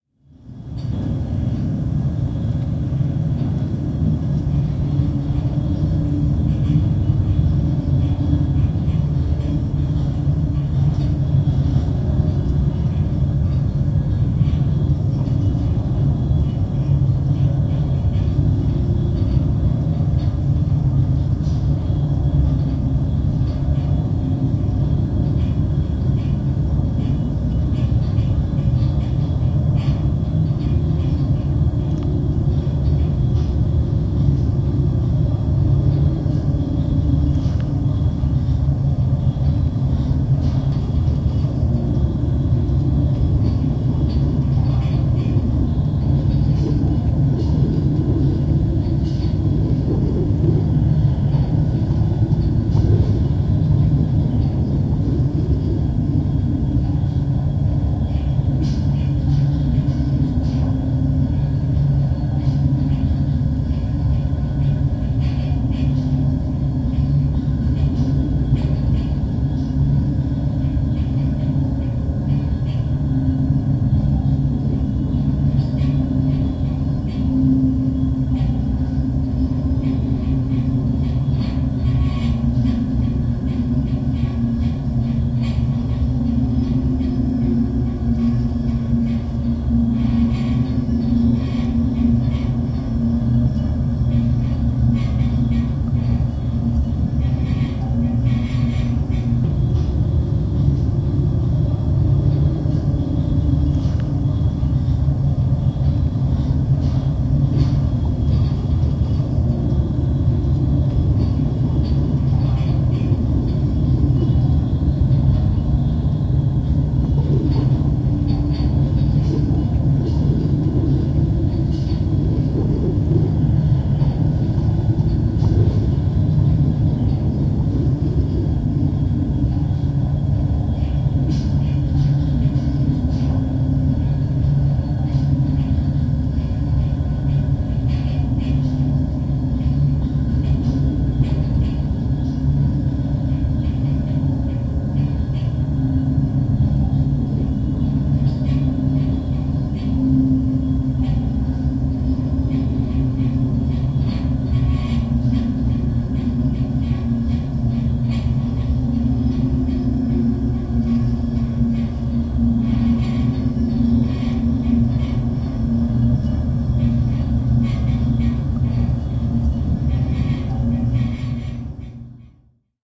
Tuuli sähkölangoissa / Wind humming and howling in the electric wires, cables, power line, metal tinkling
Voimalinja, sähkölinja, kaapelit soivat, humisevat tuulessa, metallin kilinää.
Paikka/Place: Suomi / Finland / Nurmijärvi
Aika/Date: 14.10.1987